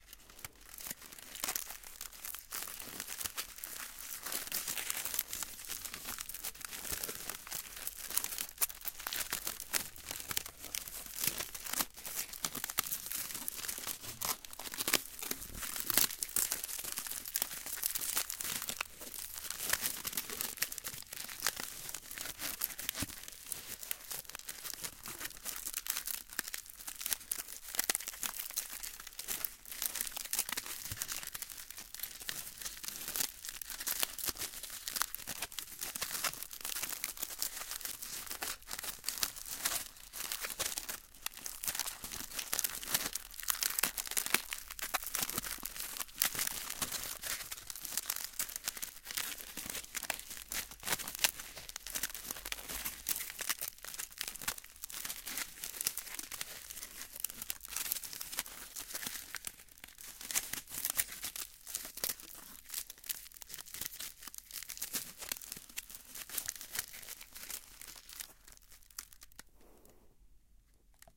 Aluminum Foil Crinkle
Ambient scrunching of aluminum foil. Stereo Tascam DR-05